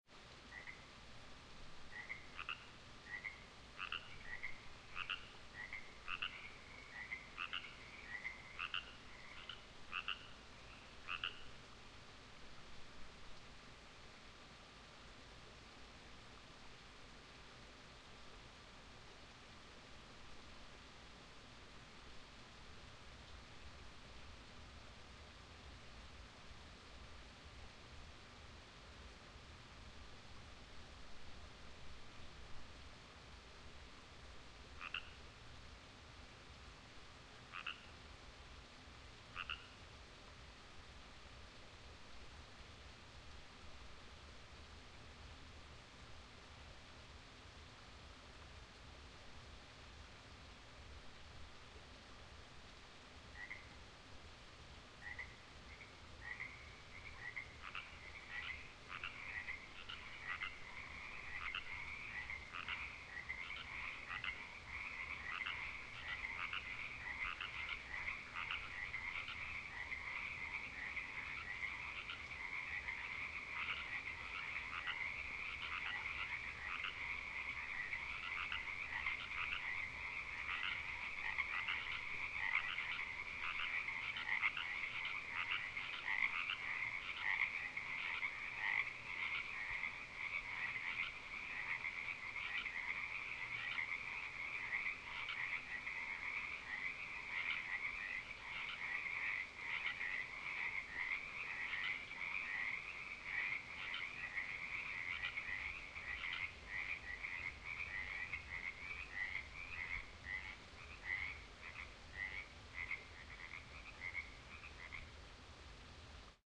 lizzie frogs long
A chorus of frogs at Lower Duck Pond near the Oregon Shakespeare Festival in Ashland Oregon. Recorded with a Zoom H4N in May 2022
chorus, frog, field-recording